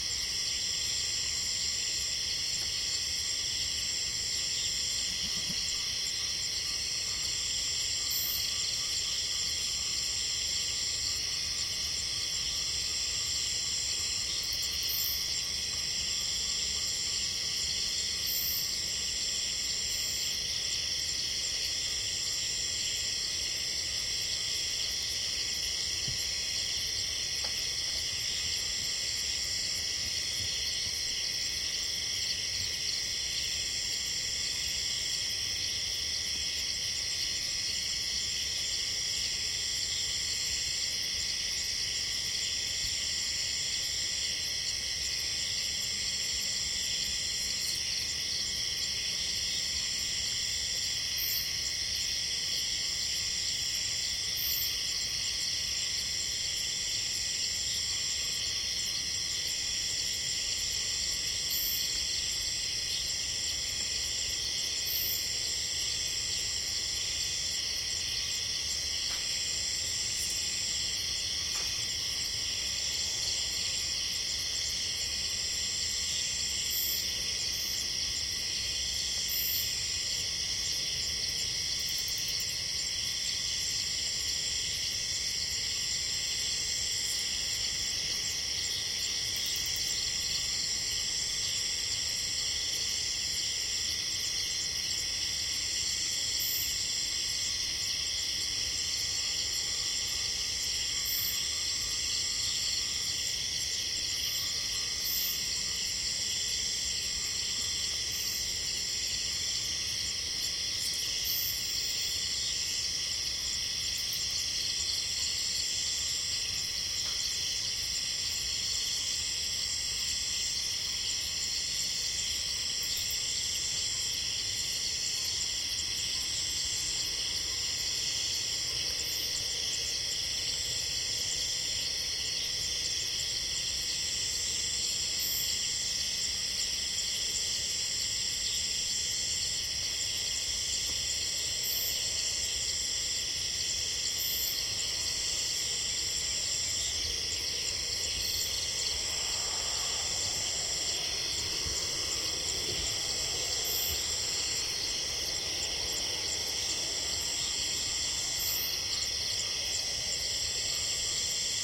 140930 night jungle nature ambience.Chiangmai Thailand. Cicades. Dogs. Roosters (ORTF.SD664+CS3e) 2
ambiance, cicadas, dog, field-recording, nature, rooster, thailand